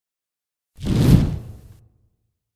Fireball Cast 1
Casting a Fireball Sound
Recorded with Rode SE3
Used foil, plastic bags, brown noise and breathing gently into the microphone layered together using reverb for the tail and EQ to push the mid-low frequencies.
Fireball, Cast, Spell, Magic, Release